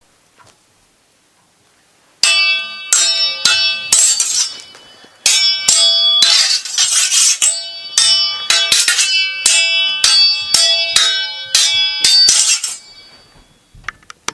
Sword Fight 1
Taken with a black Sony IC digital voice recorder.